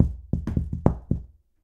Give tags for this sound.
sounds; egoless; vol; 0; natural; stomping; boxes

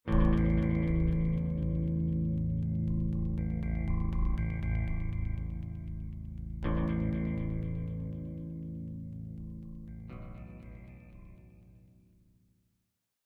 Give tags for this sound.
Suspense; Trippy; Synth

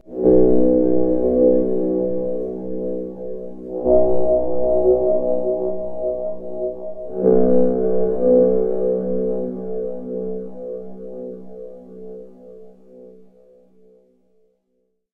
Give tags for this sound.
ambient; dark; digital; metallic; synthetic

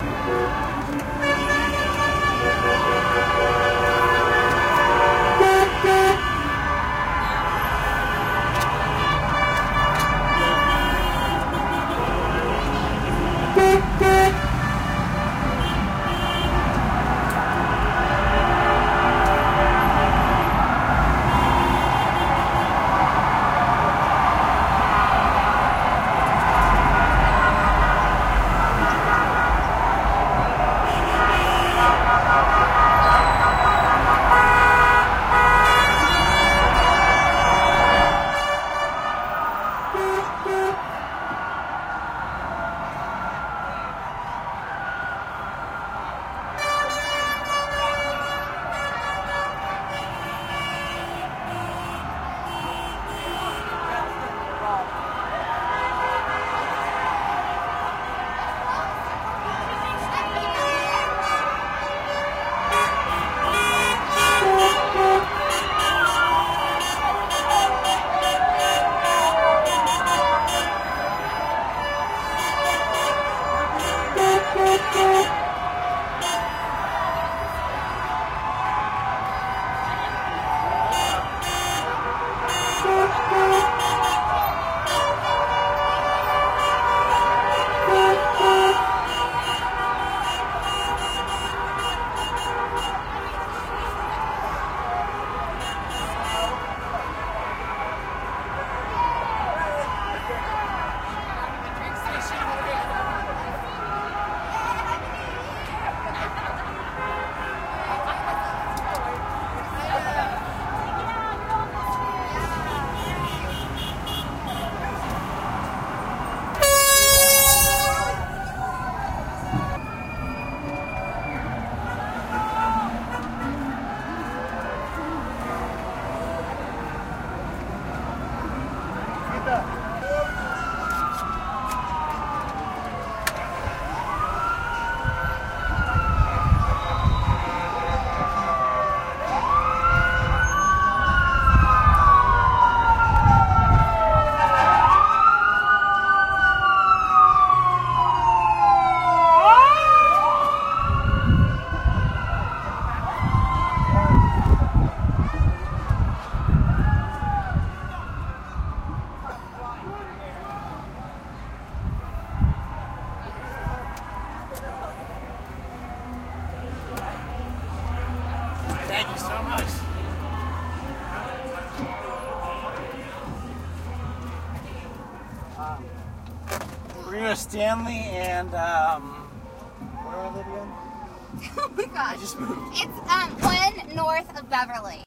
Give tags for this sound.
cars; celebration; cheering; cheers; crowd; field-recording; hollywood; honking; horns; new-year; new-years-eve

